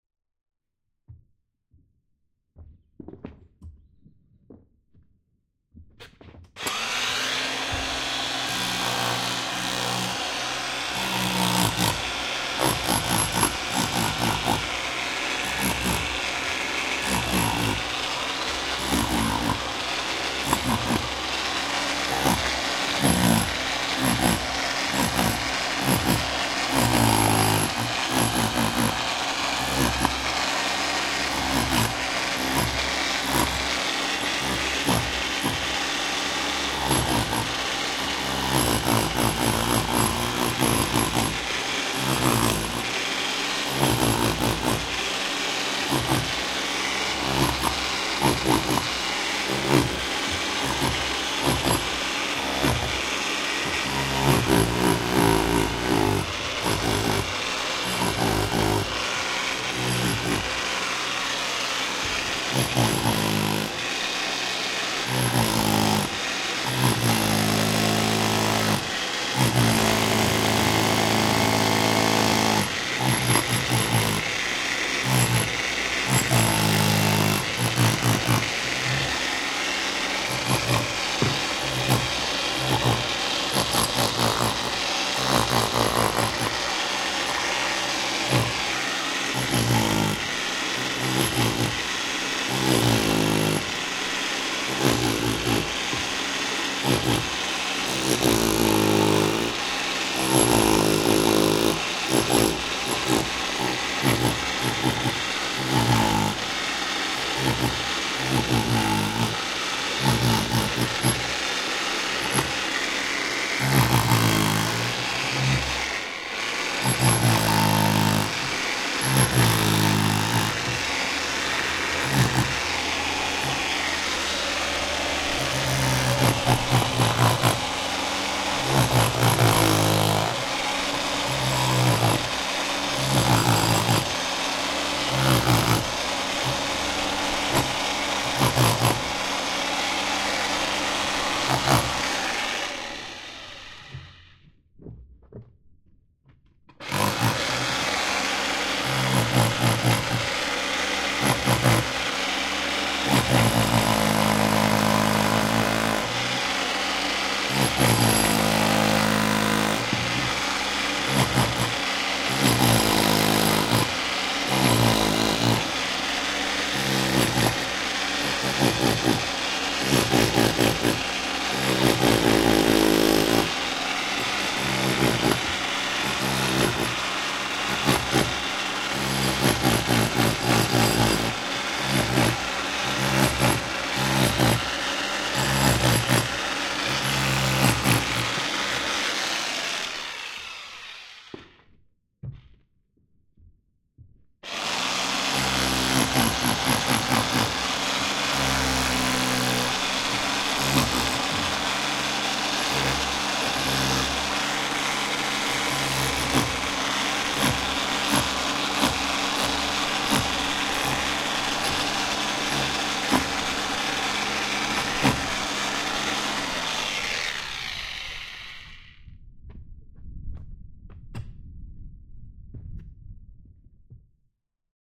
A stereo field recording of an electric SDS hammer drill removing old pointing from a granite wall. Zoom H2 front on-board mics.
machinery, field-recording, sds, stone, drill, pneumatic, stereo, percussive-drill, hammer-drill, xy